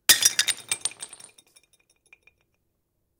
Breaking some old ugly plates and mugs on the floor.
Recorded with Zoom F4 and Sennheiser shotgun mic in a studio.